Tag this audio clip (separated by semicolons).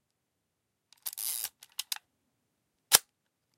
camera-click; shutter; sound-effect; Zorky-4